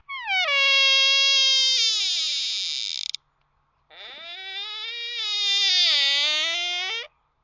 Sound of squeaky door hinge.